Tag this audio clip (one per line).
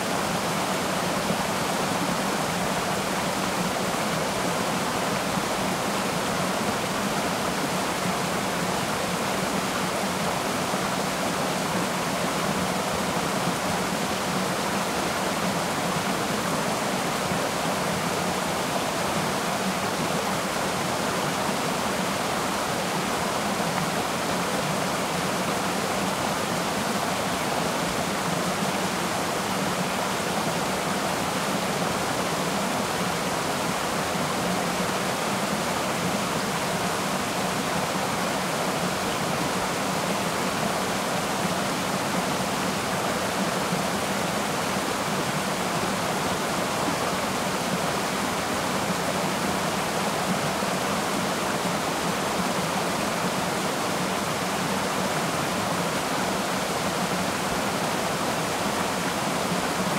Ambience
Background
Creek
Dam
Flow
Mortar
Nature
River
Splash
Stream
Water
Waterfall